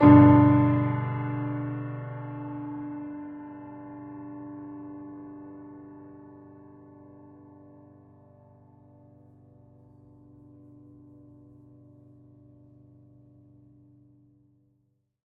Usyd Piano Chords 01
Assorted chord oneshots played on a piano that I found at the University Of Sydney back in 2014.
Sorry but I do not remember the chords and I am not musical enough to figure them out for the file names, but they are most likely all played on the white keys.
chords, keys, piano